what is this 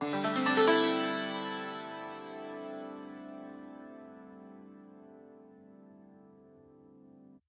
Santur Arpegio
Arpegio performed on a persian santur, recorded with an oktava mk012 mic.